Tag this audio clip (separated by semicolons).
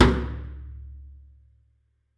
industrial; metal